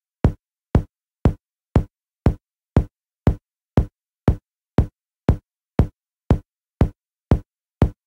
Drum Loop Solo Kick - 119 Bpm
119, bpm, drum, kick, loop, solo